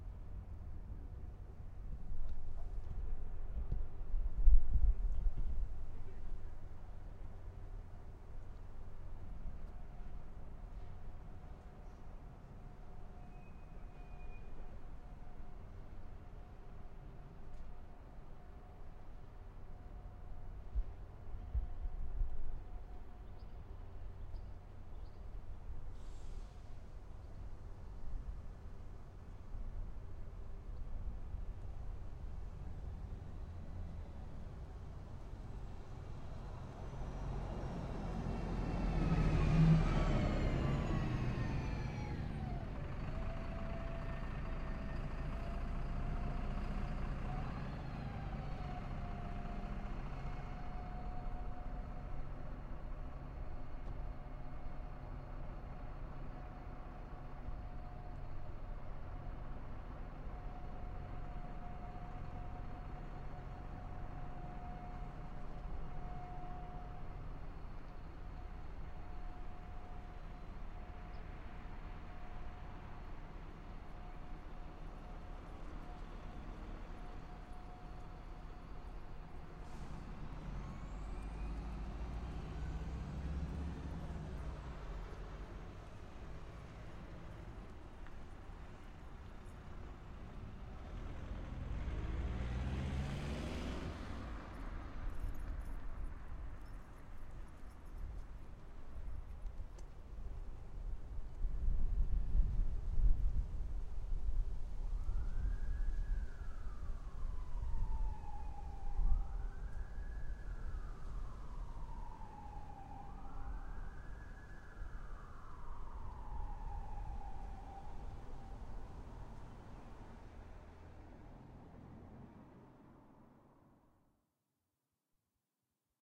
atmosphere - exteriour hospital (with bus)
Atmosphere recorded in front of hospital in Brno (Czech Republic). Unfortunately a bus arrived but maybe someone can use this.